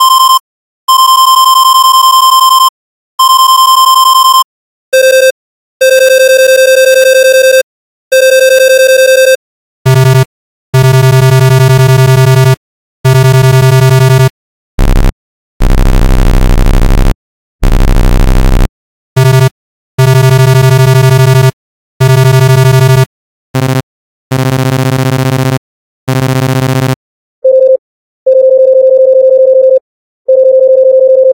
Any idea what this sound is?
8bit vocal synth
In many classic video game titles, scrolling text is accompanied by a somewhat annoying repetitive beeping noise. This is a collection of 8-bit vocal synths that I created.
vg, old, synthysis, video-game, sawtooth, old-game, square, fx, sound-fx, 8-bit, rpg, synth, vocal, 8bit, reeating-note